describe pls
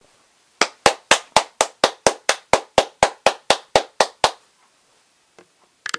A single person clapping heartily. Sixteen individual claps are heard. This audio can be layered to give the impression of multiple people clapping.
clapping, single, person-clapping, applause, applaud, Clap, applauding, claps